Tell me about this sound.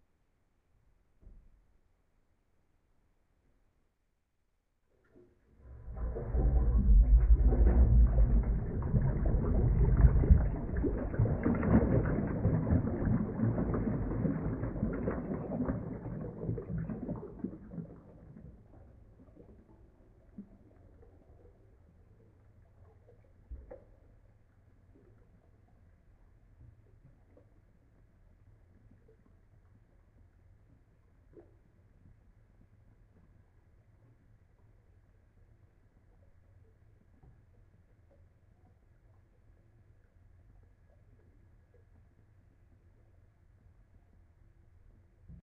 Mono recording of water falling from an opened tap into the sink. Pitched down.
pitched, water, strange, slow, sink